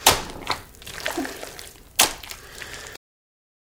Meat Slap Guts Fall